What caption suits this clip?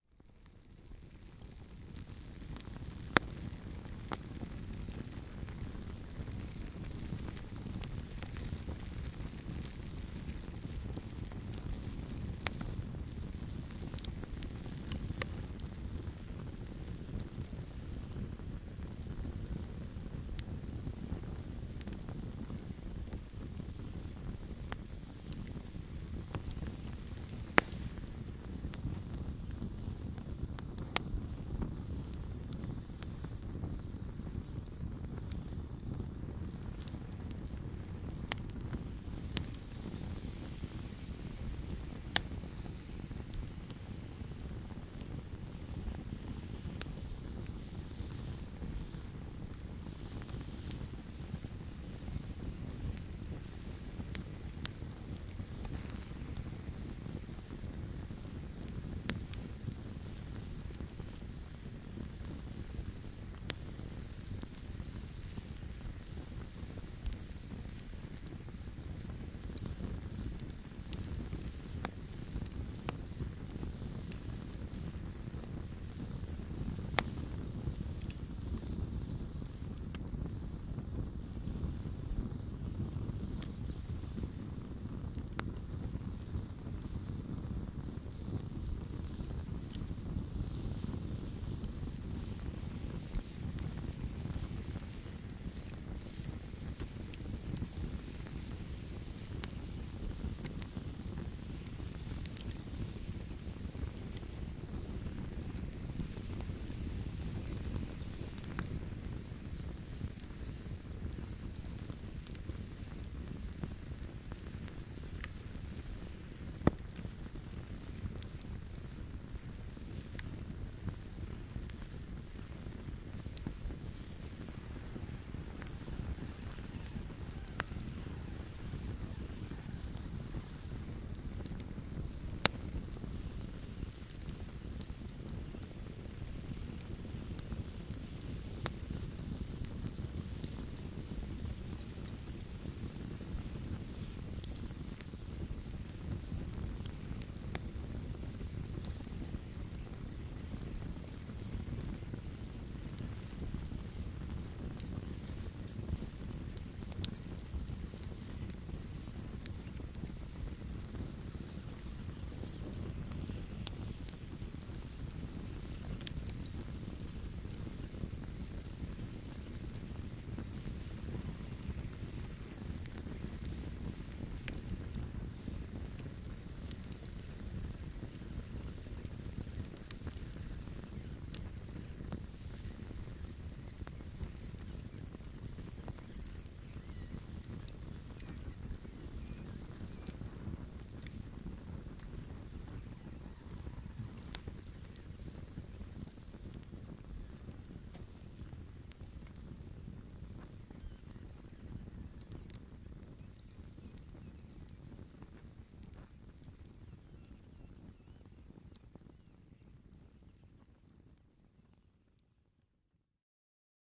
Lultju Eve Atmos with Campfire
An atmos recorded at Lultju a Warlpiri outstation near Lajamanu, Northern Territory, Australia.
An MS stereo recording done with a sennheiser MKH416 paired with a MKH 30 into a Zoom H4n
camp-fire, insects, fire